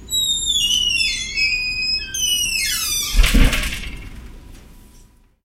Door close squeak 01
close, room, squeak, tone